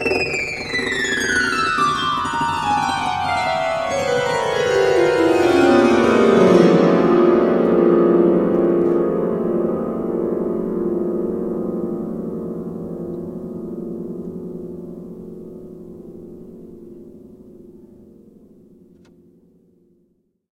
piano harp down 6
Grand piano harp glissando recorded on Logic Pro using a Tascam US-122L and an SM58
glissando, harp, piano